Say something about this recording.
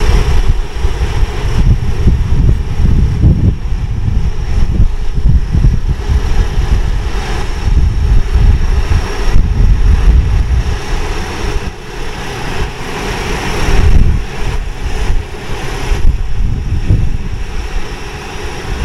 Large droning sound, from a water filtration system.
drone, industrial, machinery